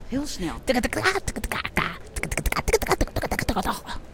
AmCS JH ME28 tuketktkaka-tktktktkoh-oh
Sound collected at Amsterdam Central Station as part of the Genetic Choir's Loop-Copy-Mutate project
Amsterdam, Central-Station, Meaning